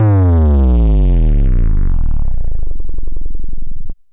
Ultra Subs 049
Ultra Subs were created by Rob Deatherage of the band STRIP for their music production. Processed for the ultimate sub experience, these samples sound best with a sub woofer and probably wont make alot of sound out of small computer speakers. Versatile enough for music, movies, soundscapes, games and Sound FX. Enjoy!